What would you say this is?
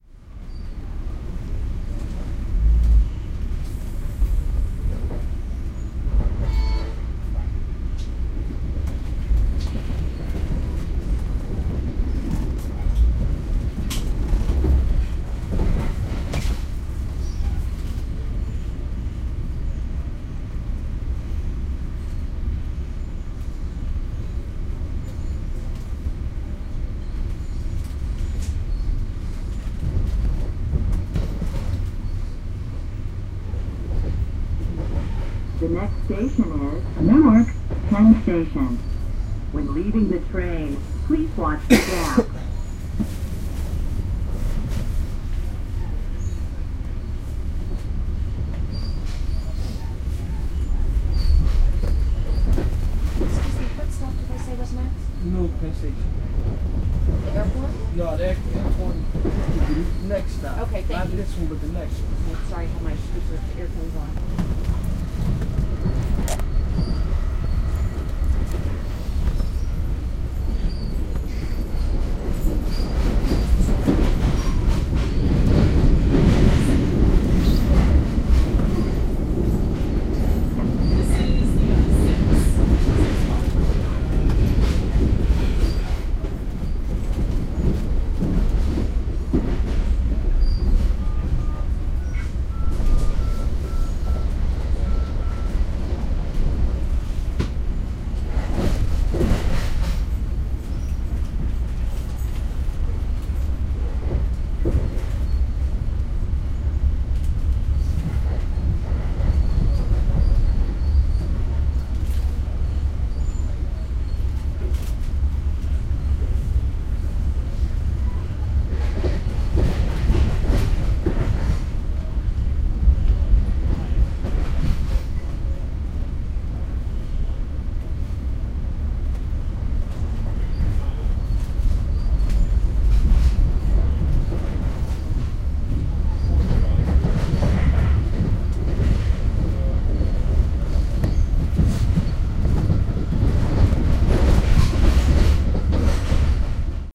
NJTransit Newark Sept2011
You can hear the boom and squeal of the train moving fast, the train conductor, and short conversations. I loved how I could feel the sounds this train was making because the rails were so wet from a storm that afternoon and the train was pretty empty. This is the best of a series of recordings I made between Seacaucus Junction and Newark Penn Station on the NJTransit in September 2011 recorded with a zoom2 hand recorder.
conversation, field-recording, NJTransit, train, voices